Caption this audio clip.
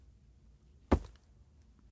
body hitting the ground
A person falling and hitting the ground